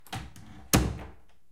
A thin door closing
door-closing, door, door-close